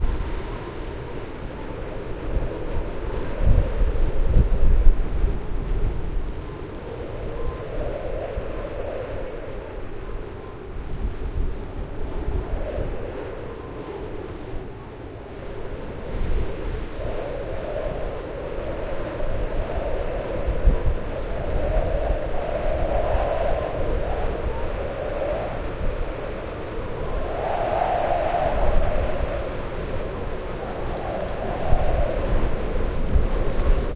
210523 1598 FR StrongWind
Very strong wind.
I made this recording during a very windy day, at Cap de la Chèvre, Brittany, France.
One can hear strong wind (gusts about 120 kph) howling through stones and nearby fences, while some sand and drops of rain are hitting my very useful rain jacket !
Recorded in May 2021 with an Olympus LS-P4 and Ohrwurm 3D microphones in a Rode Dead kitten.
Fade in/out and high pass filter at 100Hz -6dB/oct applied in Audacity.
ambience, atmosphere, blow, blowing, Brittany, cyclone, desert, field-recording, France, gust, howl, howling, hurricane, nature, rain, sand, snow, soundscape, storm, strong, typhoon, weather, wind, windy